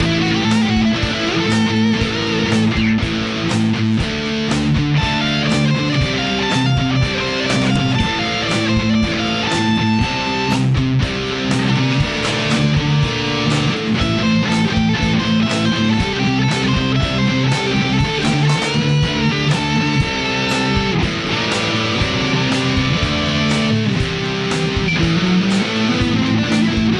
m. am sol2 maart 11
ibanez 170
bass ook ibanez
eenAm, raggensolo, gitaarlekkerr, op, opgitaar